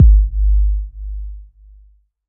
Analog Drum Kit made with a DSI evolver.